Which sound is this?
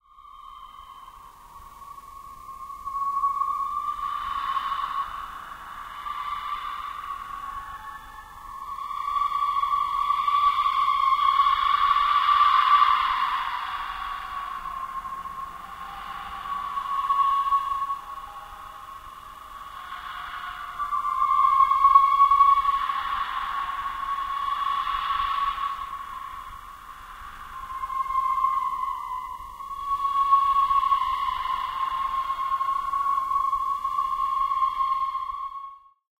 This is a kind of surreal horror ambience I made from a recording in audacity. Applied the Paulstretch as usual and played a little with the speed and roomtone. Hope you guys can use it for all your creepy horror projects!